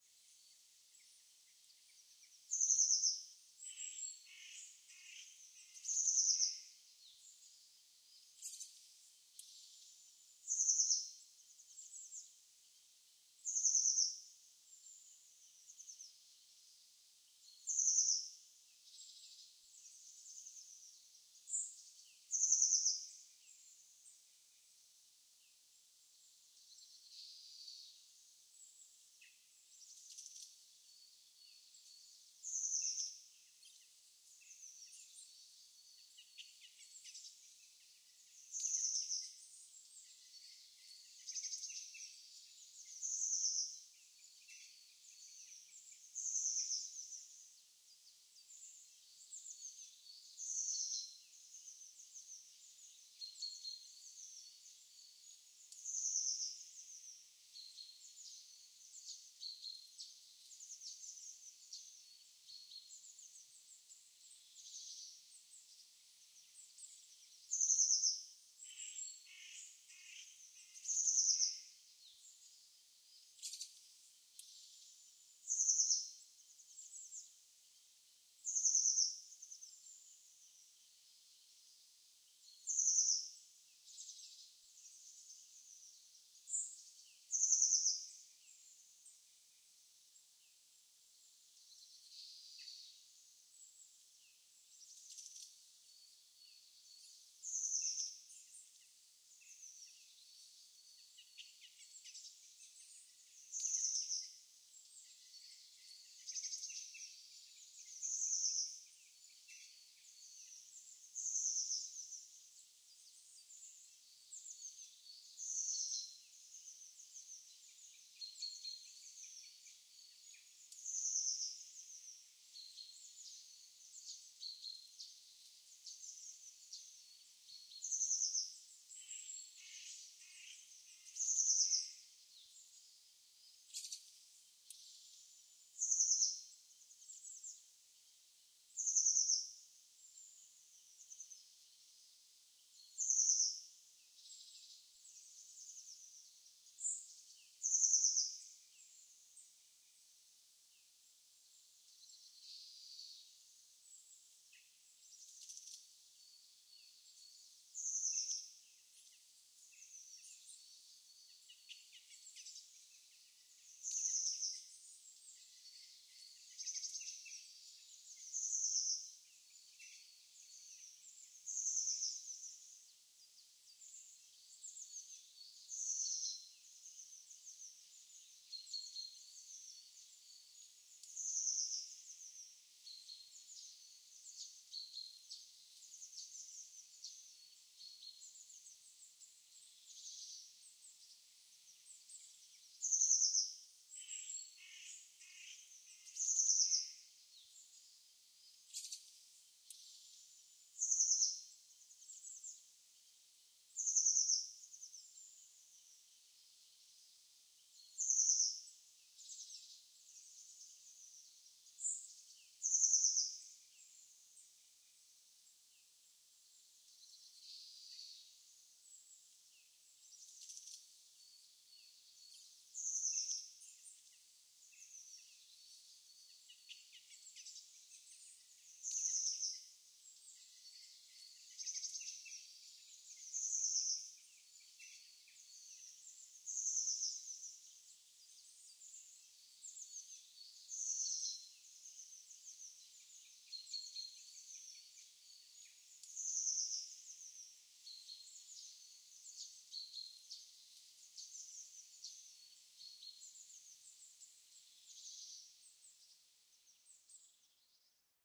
Birds In The Morning birds in the morning. Recorded in the Scottish Borders